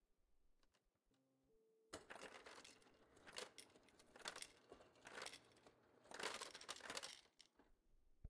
Something Tumbling?
I'm not sure what this is. Sounds like maybe something moving around on some wood?
creaking, unknown, wooden